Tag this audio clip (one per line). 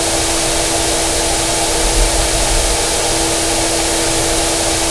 Atmospheric
Background
Everlasting
Freeze
Perpetual
Sound-Effect
Soundscape
Still